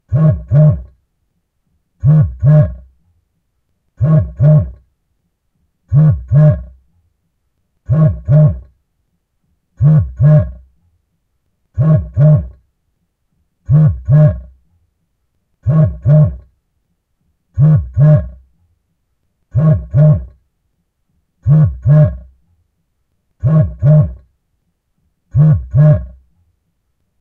New Pm
Cell phone vibrating on a hard surface, recorded from within.
I put a Nokia 6060 on top of a turned-over plastic IKEA bin and a Zoom H2 under it.
cell, communication, device, handy, mobile, nokia, phone, technology, telephone, vibration, vibrator